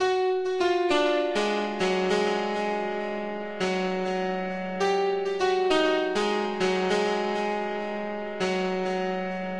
100 Dertill Zynth 04
dirty, digital, crushed, synth, bit